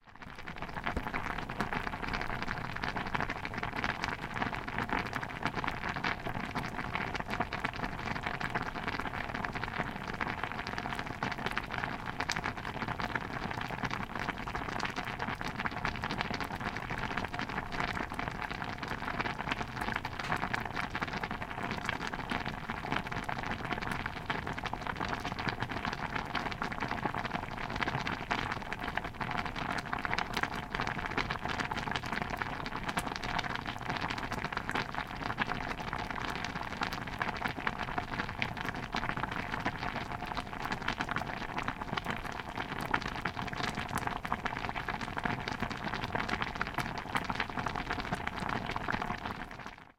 Lentil stew :D